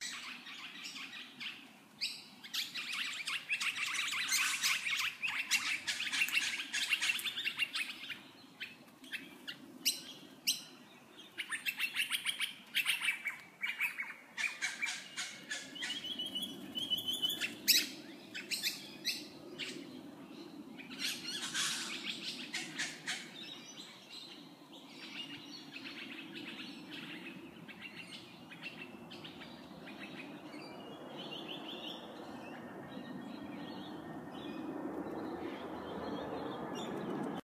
A sound of bird tweets and chirps.
Bird, Chirp, Tweet